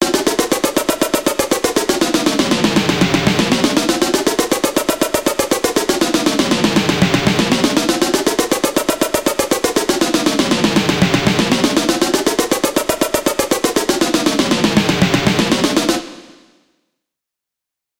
Just a drum loop :) (created with Flstudio mobile)